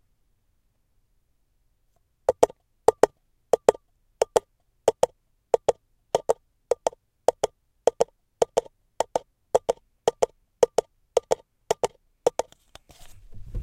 Sound of a horse galloping made with two paper cups.
This sound clip was recorded using the LAB (Learning Audio Booth)
horse, trotting